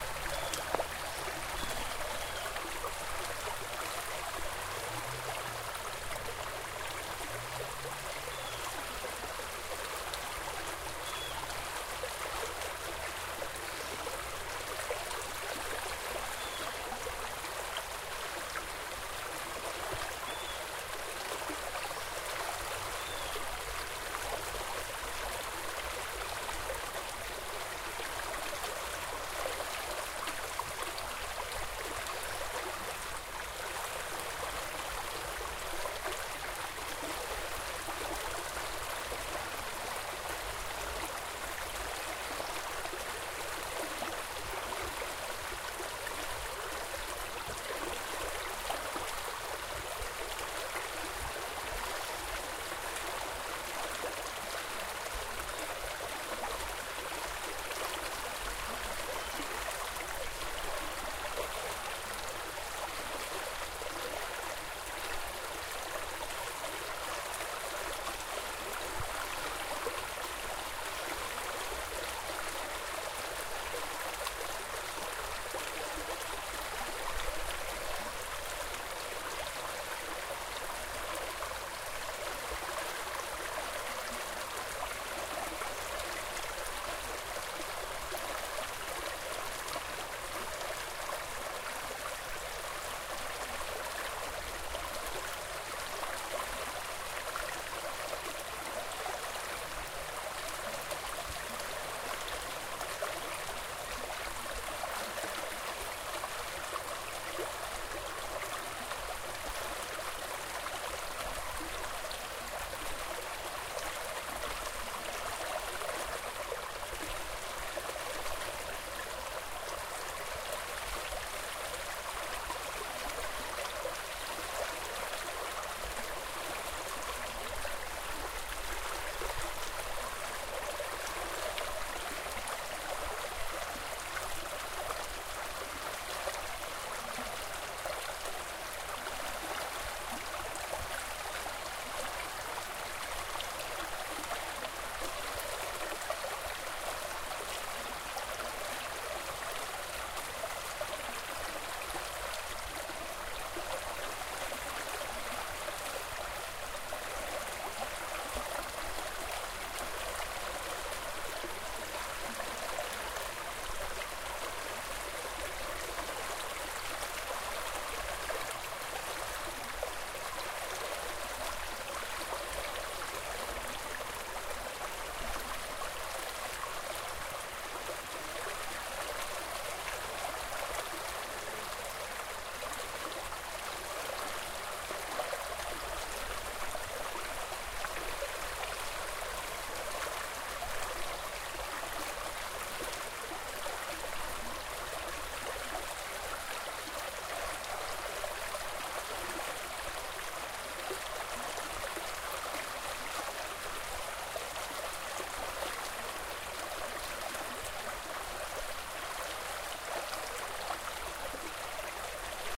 Water over a Tree Limb
A calm, quiet, somewhat up-close sounding recording of a creek flowing over a tree limb. Zoom H4N.
water, calm, ambience, outdoors, light, tree-limb, flow, campsite, nature, creek